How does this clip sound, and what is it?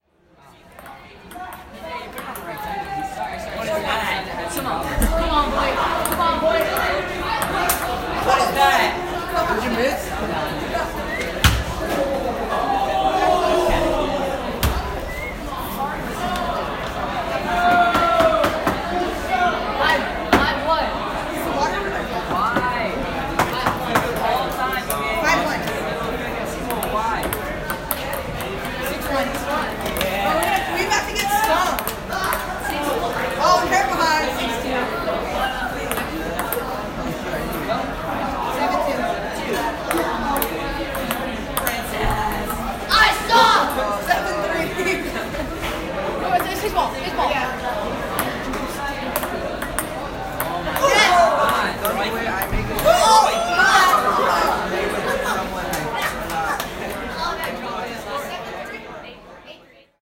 Loyola Field
Loyola High School Field Recording
pong, ping, school, recording, field, loyola, high